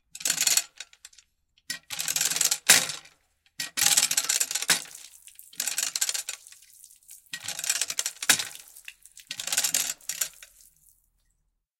A manual water pump. Recorded West Lafayette Indiana.
water, pumping-water